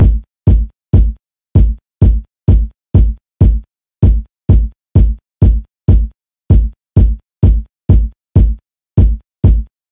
097-heavy-kick-loop
bassdrum, kick, kickdrum